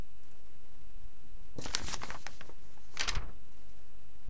One A4 paper sheet grab from table and shaking it one time
a4, grab, paper, shake